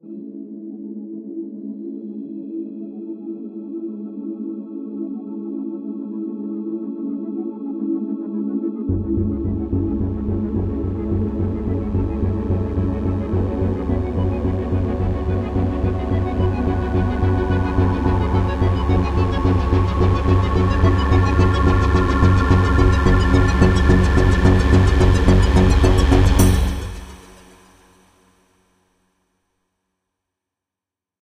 tense synth build up
A tense, evolving synth build up (108 bpm). Good for an evoking an unsettling or mysterious mood.
mysterious, unsettling, synth, build-up, ominous, dark, movie, cinematic, suspense, suspenseful, tense, 108bpm, tension